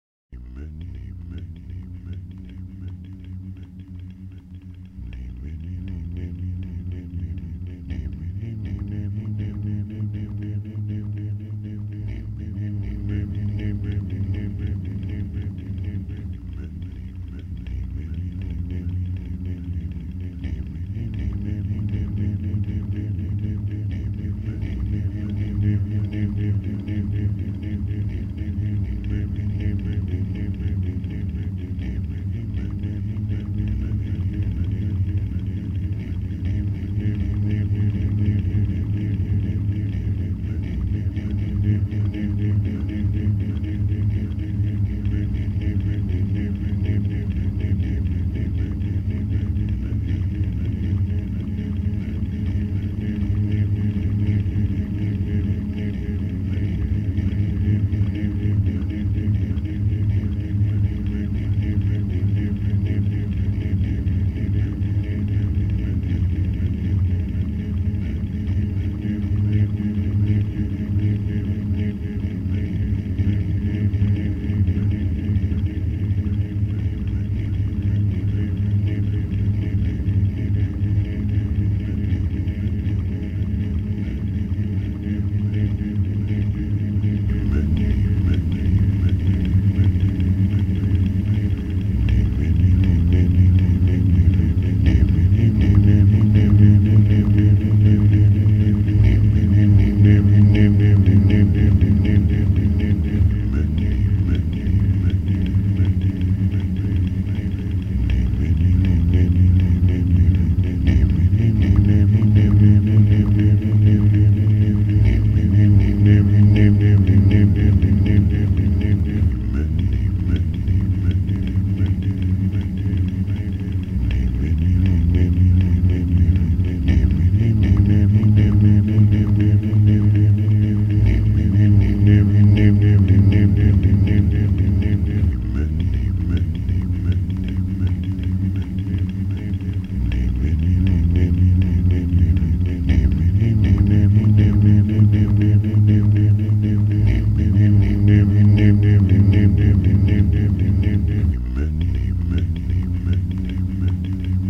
A simple 15 seconds long voice is copied and then added (pasted) ever each 5 seconds until 12 channels are filled and mixtured. From the middle the whole process is reverted i.e. a subtraction each 5 seconds.